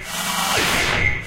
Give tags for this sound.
industrial; loop; machine; machinery; mechanical; noise; robot; robotic

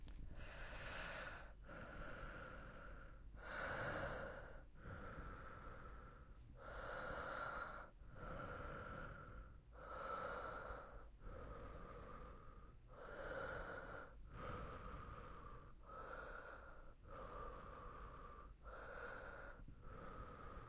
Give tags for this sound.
breath; mouth; breathing; human; female

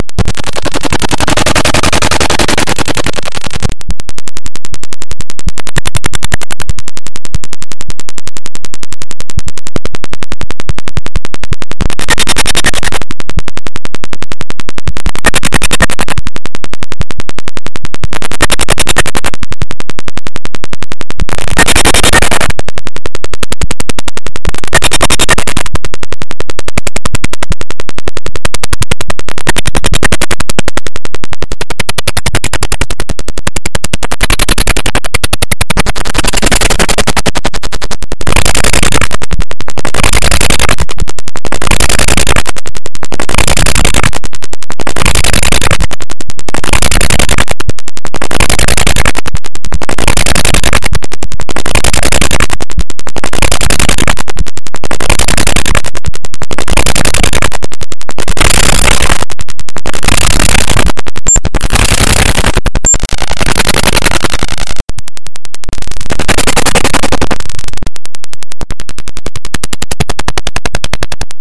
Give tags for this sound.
bit; bitmap; distortion; image; map; noise; picture; raw

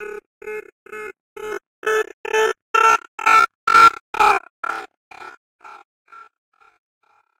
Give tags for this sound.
gritando; nortec; voz

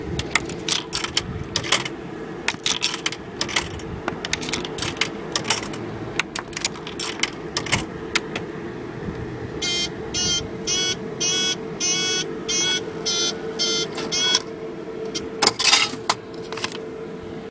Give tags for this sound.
field-recording; printer; car-park; alarm; press; meter; parking; machine; printing; click; bleep; beep; parking-meter; ticket; metal; money; mechanical; impact; metallic; hit; buzz; button; buzzer; fall; thud; collision

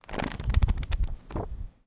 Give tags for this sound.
book; book-pages; campus-upf; library; quick-look; quick-read; shelves; UPF-CS12

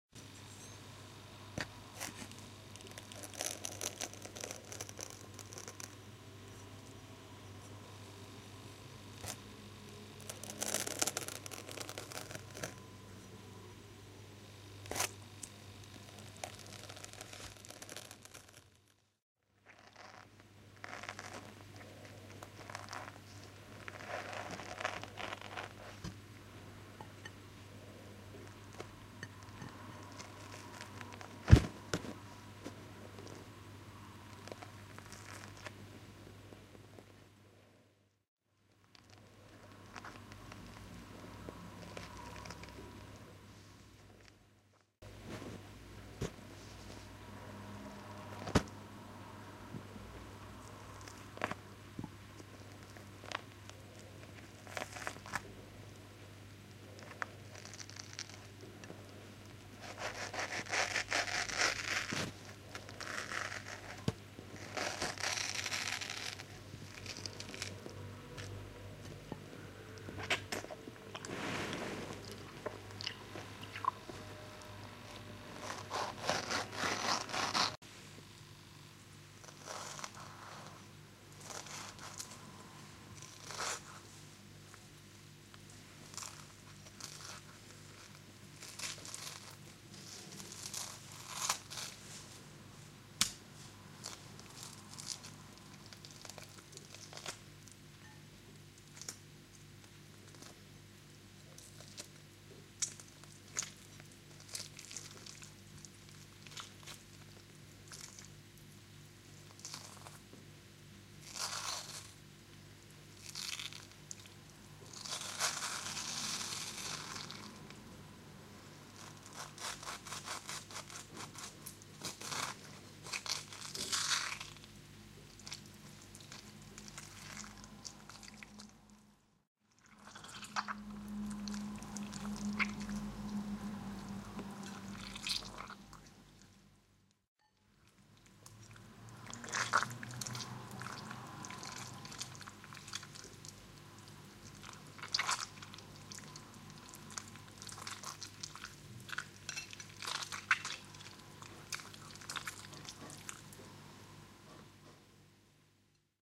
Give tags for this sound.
fruit; mango; juicy

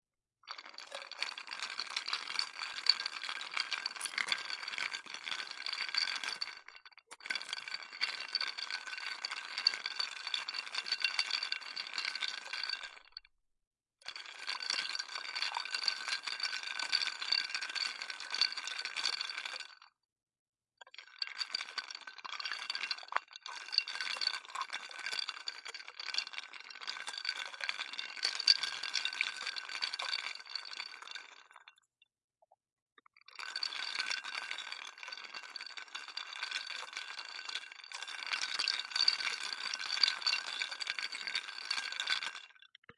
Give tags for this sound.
glass-cup; ice; liquid; relaxing; sony-ic-recorder; straw; water

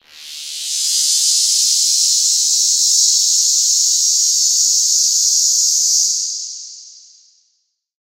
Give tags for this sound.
ambient; industrial; machine; multisample; reaktor

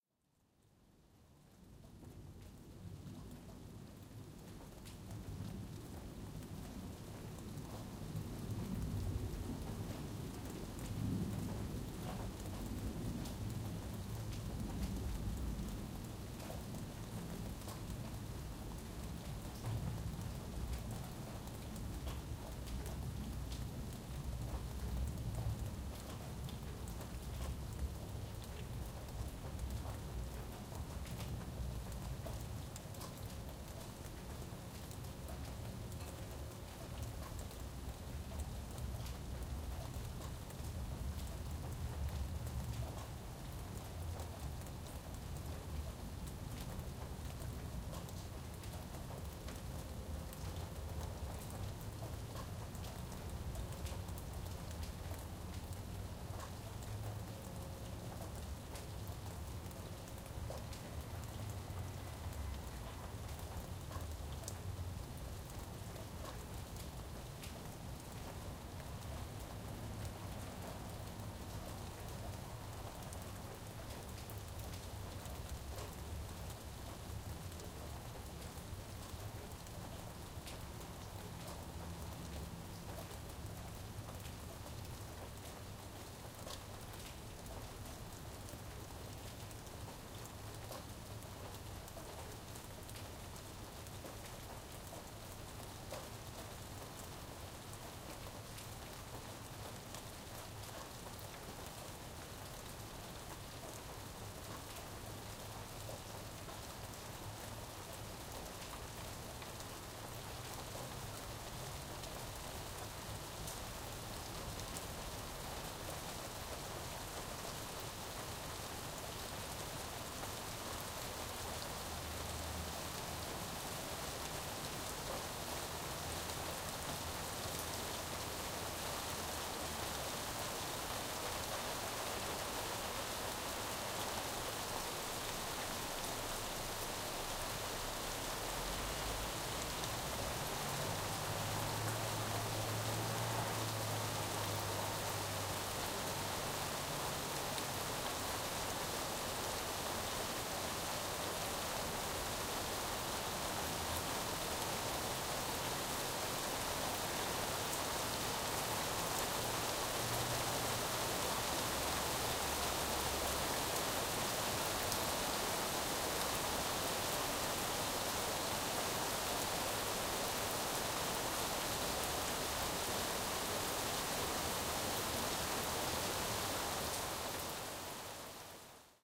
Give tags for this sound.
California; city; drops; night; rain; rainfall; raining; shower; weather

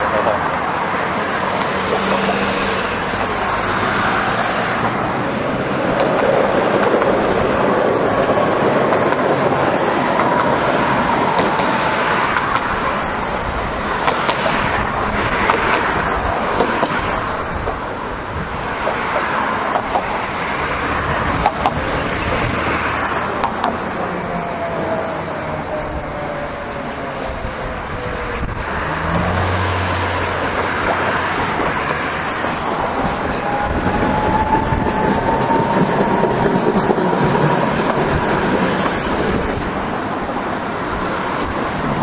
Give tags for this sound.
bridge; latvia; riga; traffic

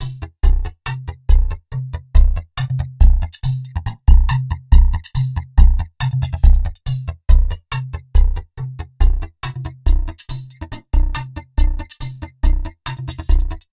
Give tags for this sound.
140; Beat; Bpm; Loop

